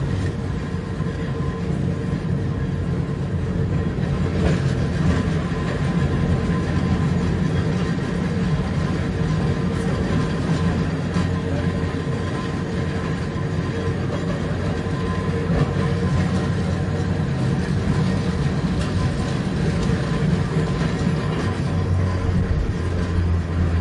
blue tram 2
Riding old tram "blue tramway" in Barcelona. You can find part I, called blue_tram_1
traffic, city, street, tram, field-recording, town